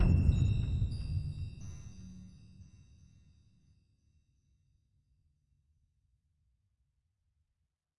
alien-impact r1 session
Sound effect for alien impact made with Ardour3 and Phasex on Debian GNU/Linux
120bpm, effect, electronic, jingles, sound